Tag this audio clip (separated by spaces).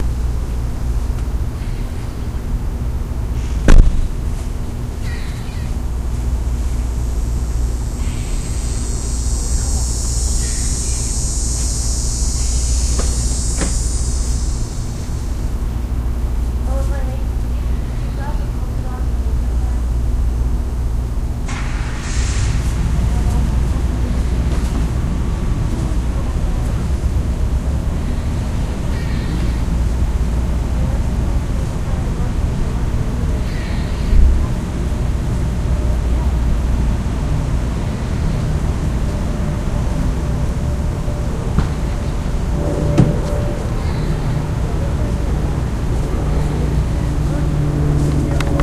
insect field-recording bird squirrel